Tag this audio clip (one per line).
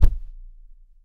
hit
thump